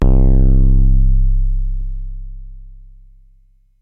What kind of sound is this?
MAM ADX-1 is a german made analog drumbrain with 5 parts, more akin to a Simmons/Tama drum synth than a Roland Tr-606 and the likes.